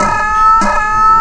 Tube alarm jingle component
alarm, component, jingle, tube